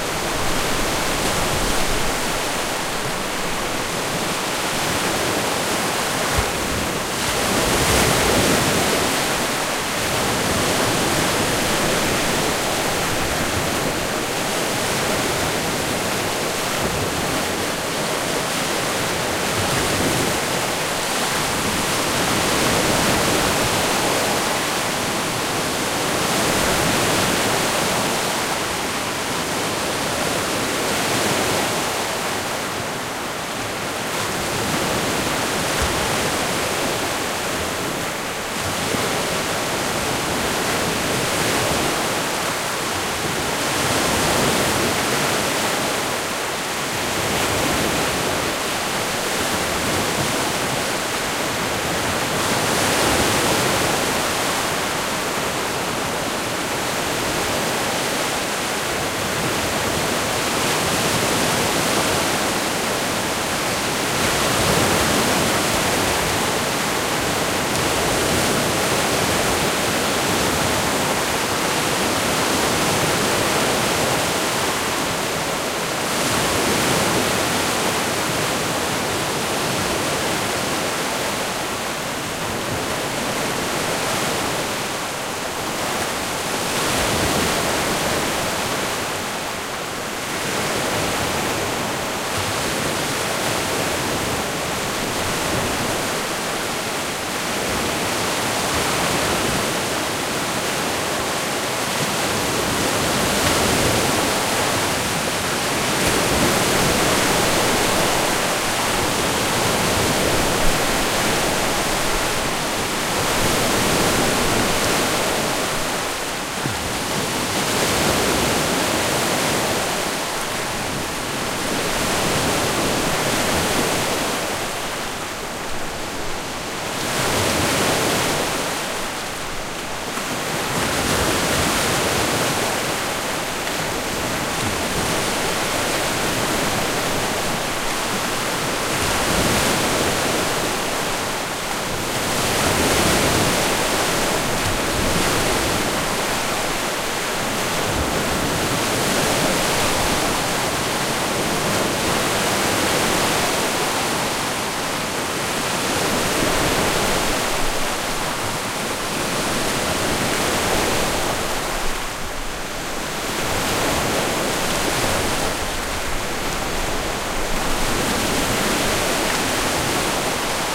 TRACK02 SHORE LOOP 01 2-52
This was recorded with two Behringer measurement mics. Located about 30 meters from the surf.